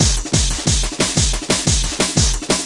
Roller Derby 02
amen, breakcore, rough, breaks